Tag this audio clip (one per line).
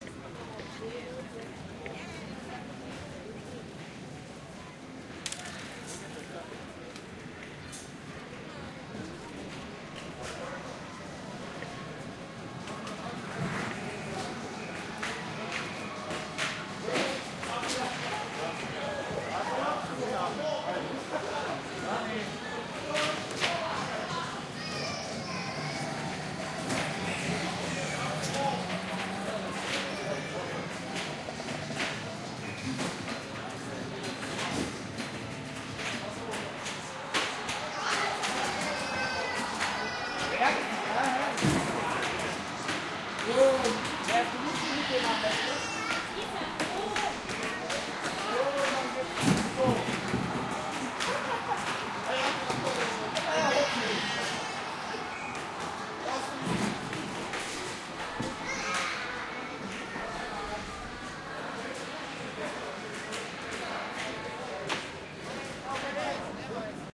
voices
Austria
ambience
fun-ride
Prater
people
field-recording
park
talking
amusement
Vienna
crowd
ambiance
general-noise
laughing